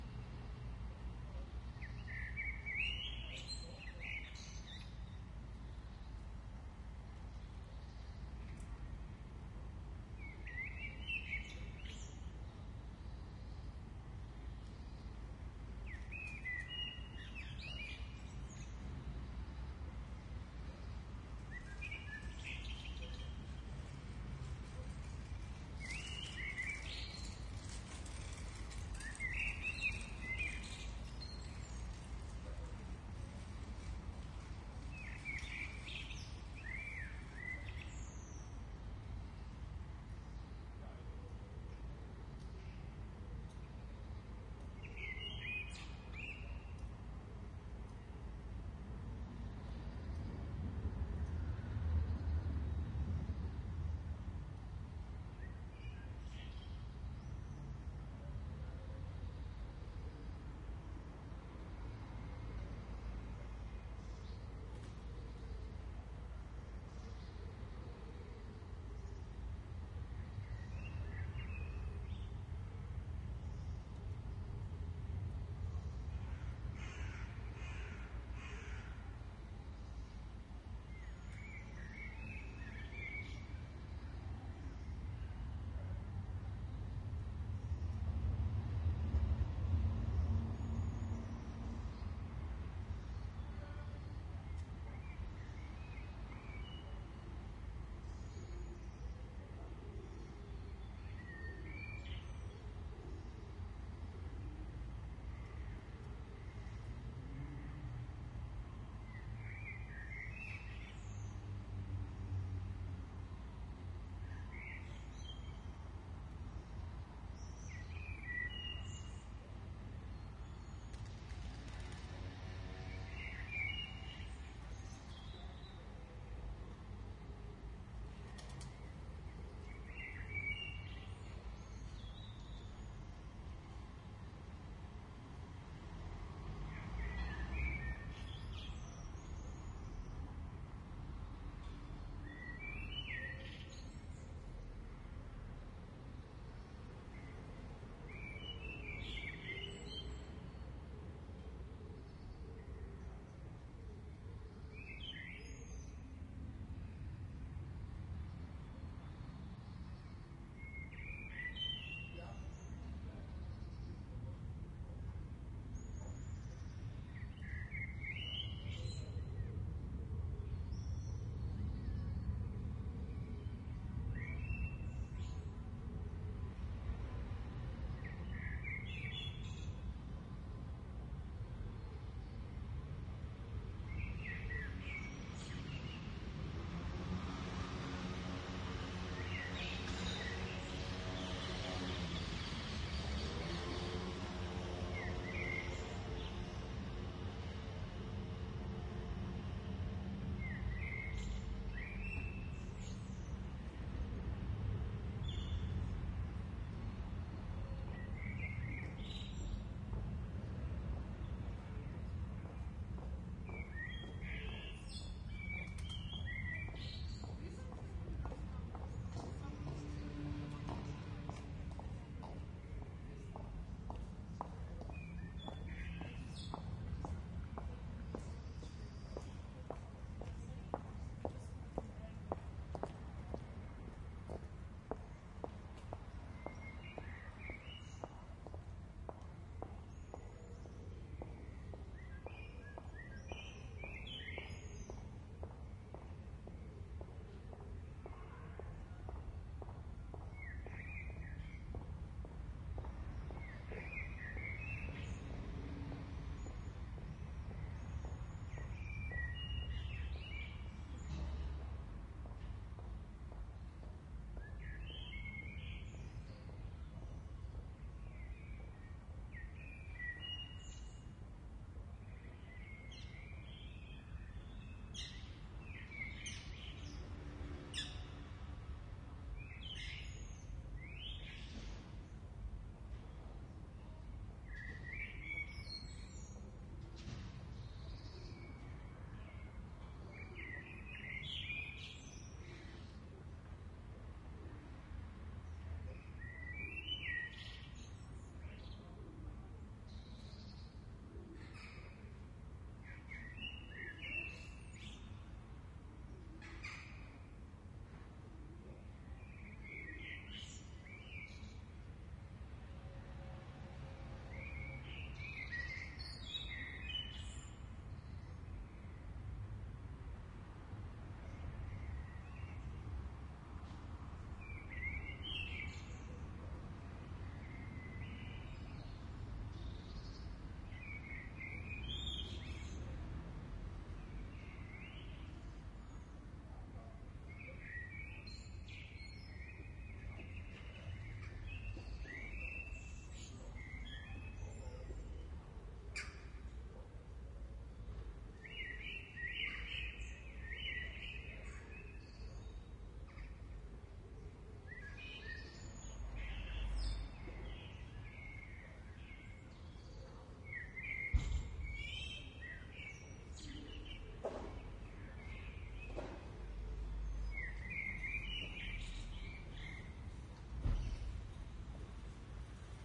Amsterdam Kastanjeplein (square)
Kastanjeplein, Amsterdam- a lovely small square on the east side of the city. Recorded around 19:30 in the evening.
EM172 > ULN-2
ambiance, Amsterdam, city, dutch, evening, Kastanjeplein, square